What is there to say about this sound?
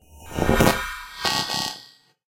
Attacks and Decays - Double Hit 1
Same timbre used throughout this sample pack. Clearly defined "rise" with a double concluding "hit". More effect than percussion.
electronc, experimental, hit, spectral, synthetic